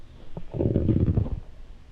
small ball spinning on a wooden surface
tiny ball spinning on a wooden floor
spinning, ball, toy